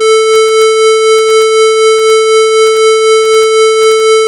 - Mono (square, frequency 440)
- Effect "Progressive variation of the height" (-13 to +52)
- Effect "Tremolo" (triangle)
square, tremolo, mono